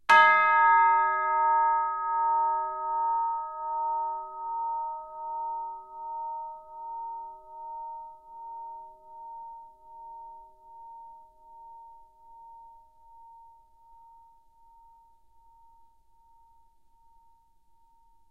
Instrument: Orchestral Chimes/Tubular Bells, Chromatic- C3-F4
Note: D#, Octave 1
Volume: Forte (F)
RR Var: 1
Mic Setup: 6 SM-57's: 4 in Decca Tree (side-stereo pair-side), 2 close
chimes, bells, music, decca-tree, sample, orchestra